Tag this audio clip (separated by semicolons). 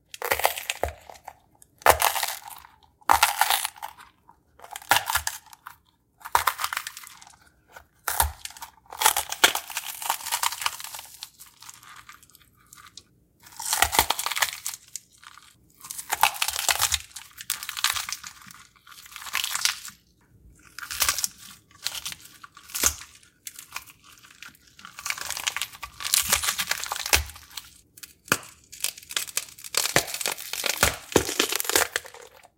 munch; grow; pepper; vines; eat; interior; wet; apple; crunch; chew; zombie; bell; bite